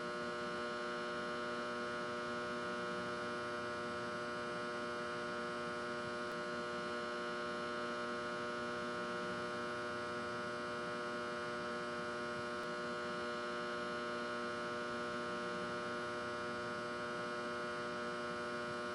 Sound emitting from a large electrical box outside an industrial building.